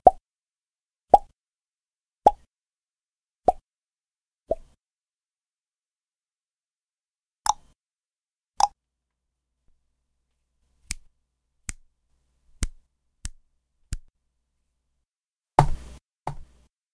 Pops, Snaps, mouth bubble pop sounds, and 2 Mic knocks at the end. They are in order from loudest to lower sounding.Good for funny cartoon animation. The type of sound you might use when a thought bubble or light bulb shows up over a character's head.Recorded using an Audio Technica AT4033/CL studio Mic.
snaps, sounds